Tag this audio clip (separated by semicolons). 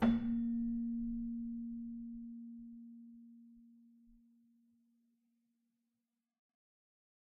bell; celesta; chimes; keyboard